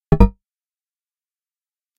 Advancing Confirmation Sound
UI sound effect. On an ongoing basis more will be added here
And I'll batch upload here every so often.
1, 3-Octave, Advancing, Generic, Third-Octave, Confirmation, Sound